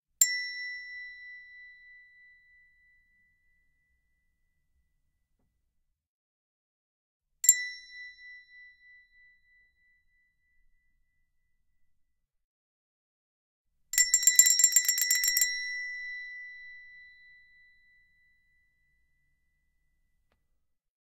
chromatic handbells 12 tones b1
Chromatic handbells 12 tones. B tone.
Normalized to -3dB.
bell, chromatic, double, English-handbells, handbell, percussion, ring, single, stereo, tuned